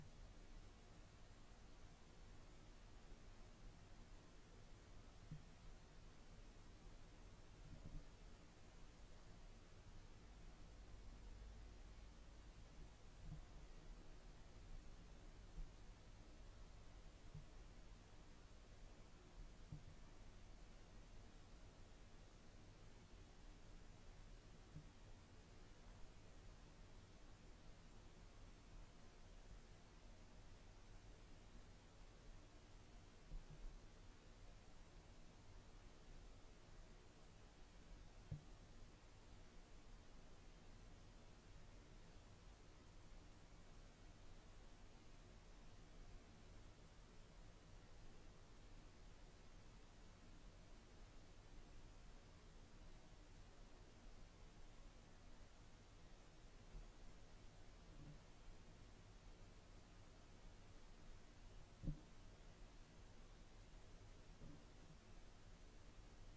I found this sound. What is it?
03 empty office, ambient
the atmosphere of an empty, medium-sized office
ambient, Panska, Czech, office, CZ